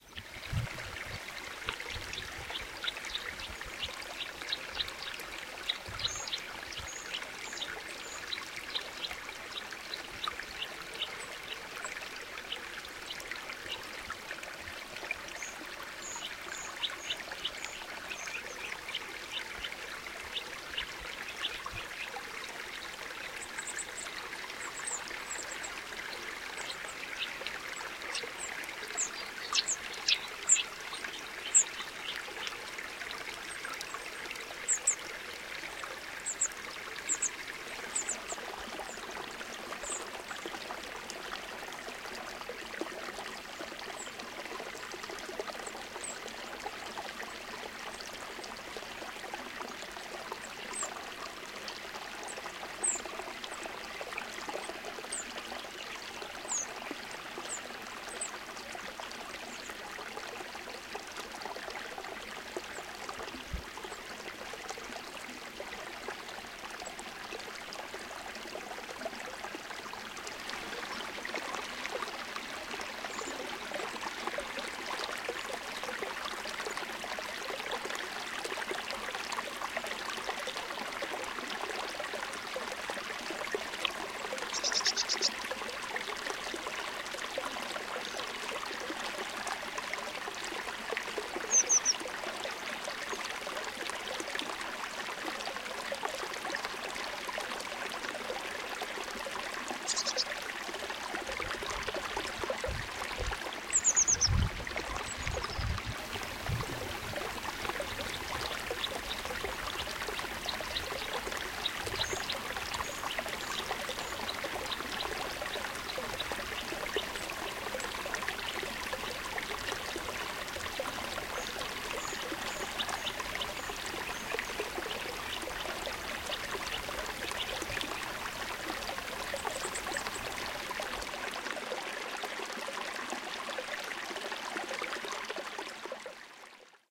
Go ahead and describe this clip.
Cuerda de Majaltovar - Agua :: Majaltovar line - Water creek
En primer plano el sonido de un pequeño arrollo de montaña, canta un Trepador Azul y después un Herrerillo Común.
In the foreground the sound of a small mountain creek, sings a Eurasian nuthatch and then a Eurasian blue tit.
Grabado/recorded 20/07/14
ZOOM H2 + SENNHEISER MKE 400
nuthatch, herrerillo-comun, trepador-azul, brook, naturaleza, agua, Spain, stream, La-Adrada, mountain, arroyo, pajaros